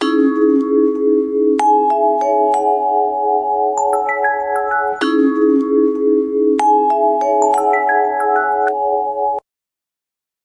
Nightime song
free, music, song